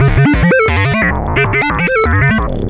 Occasionally created acid sequence, repeated tweaked.